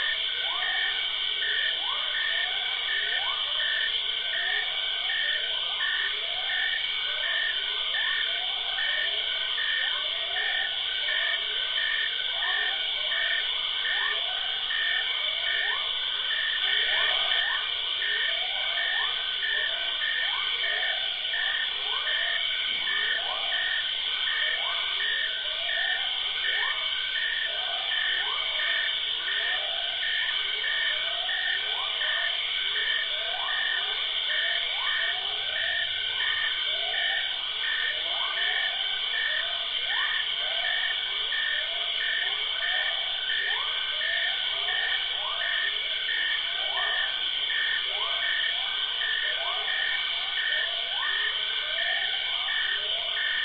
Sample from the Caiman listening station, captured in Audacity and speed slowed down using the 78 to 33.3 RPM vinyl controller.